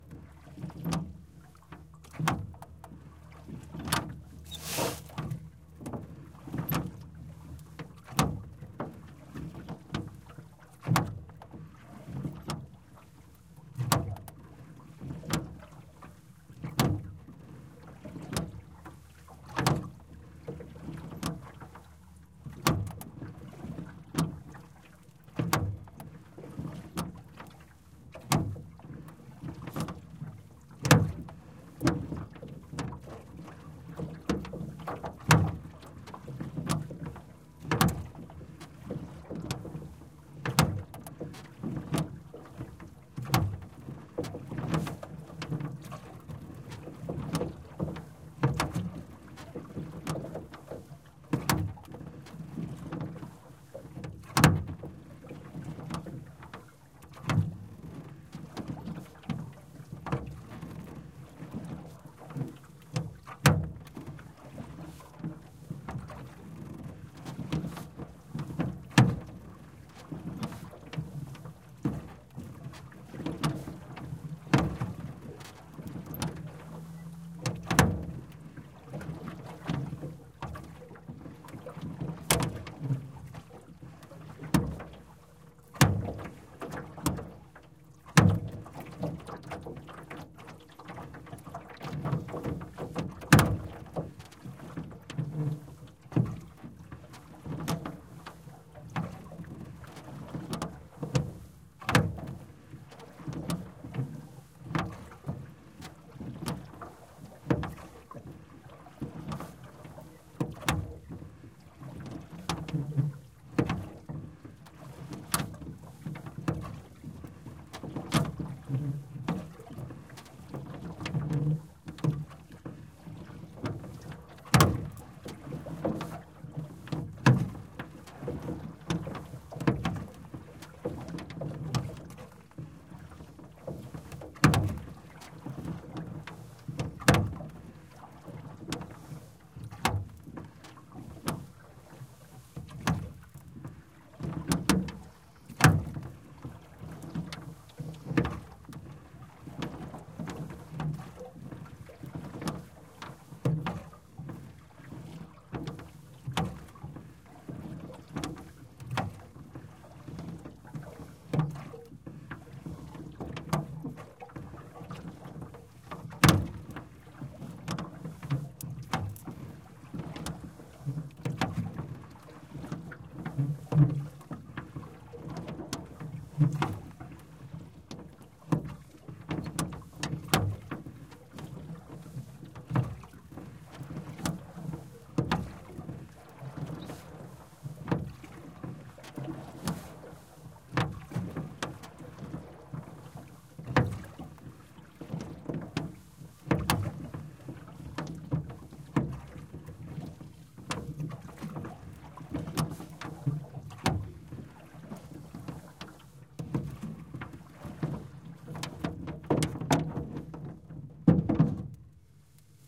Oar Hinges On Rowboat
recorded at Schuyler Lake near Minden, Ontario
recorded on a SONY PCM D50 in XY pattern